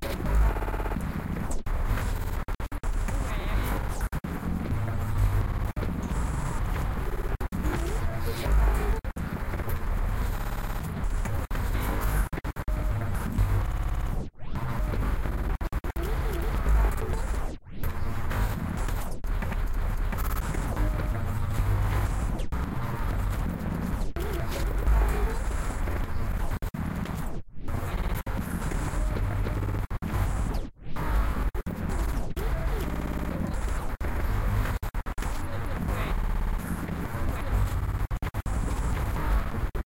tb field street
One in of a set of ambient noises created with the Tweakbench Field VST plugin and the Illformed Glitch VST plugin. Loopable and suitable for background treatments.